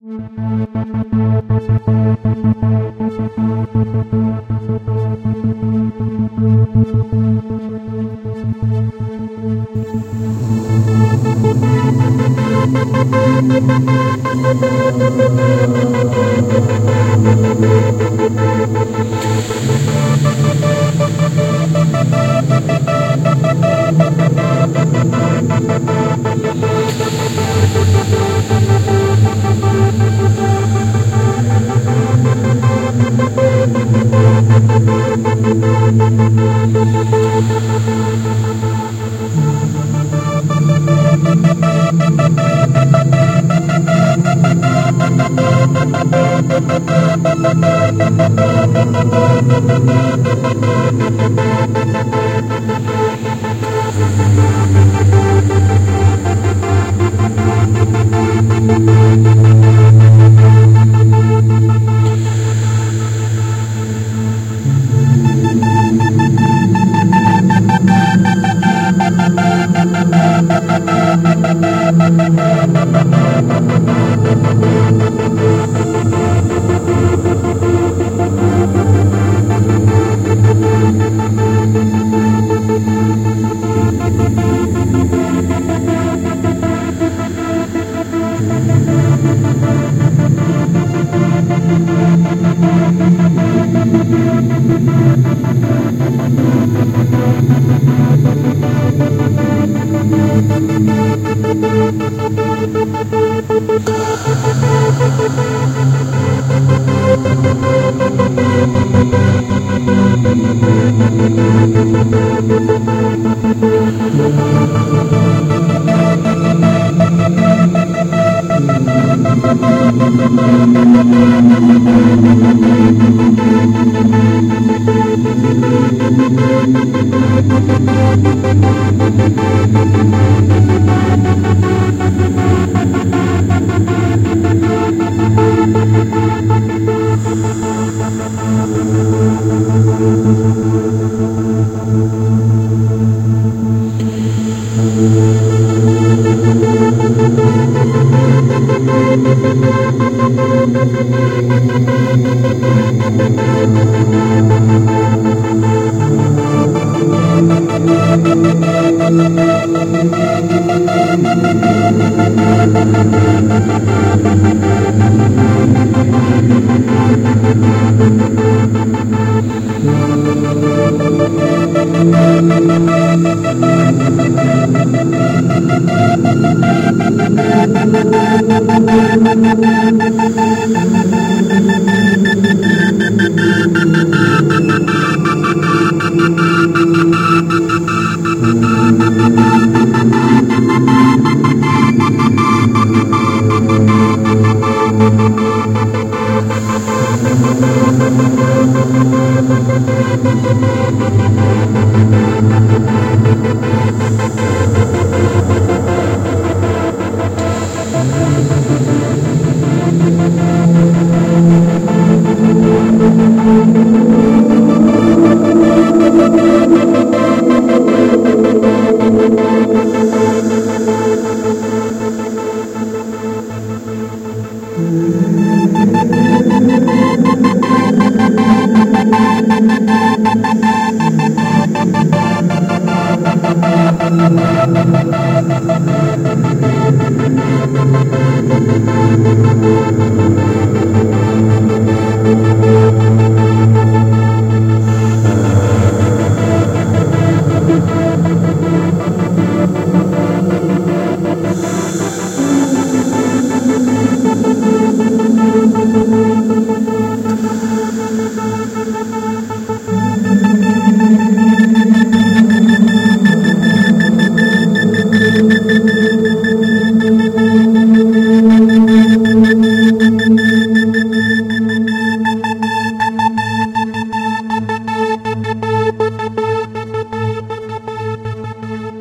meditation music and voice by kris
did this on ableton with my own voice :)
voice, old-music, kris-klavenes, music